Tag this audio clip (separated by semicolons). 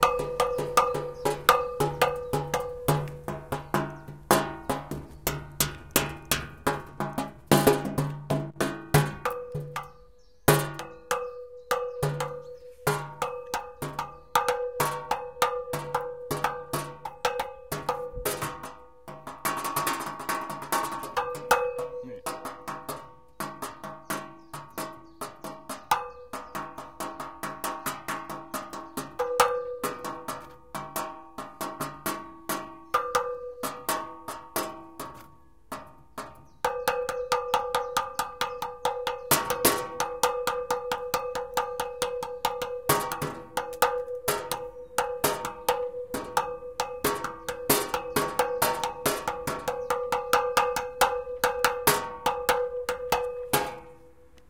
bin; garbage; plate; sheet; trash